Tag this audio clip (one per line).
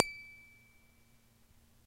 electric
guitar
headstock
pluck
string